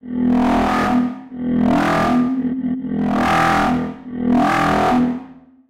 Wookie Growl 2
wookie, drone, evolving, experimental, ambient, space, soundscape